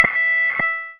PPG 021 Fretless LeadSynth E5
The sample is a part of the "PPG MULTISAMPLE 021 Fretless LeadSynth"
sample pack. It is a sound similar to a guitar sound, with some
simulated fretnoise at the start. Usable as bass of lead sound. In the
sample pack there are 16 samples evenly spread across 5 octaves (C1
till C6). The note in the sample name (C, E or G#) does indicate the
pitch of the sound but the key on my keyboard. The sound was created on
the Waldorf PPG VSTi. After that normalising and fades where applied within Cubase SX & Wavelab.
ppg, bass, multisample, lead